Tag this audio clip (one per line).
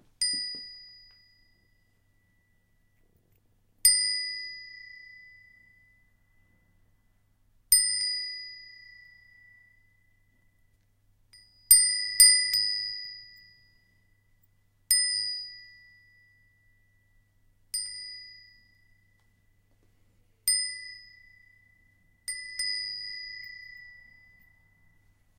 bell
bells
handheld
percussional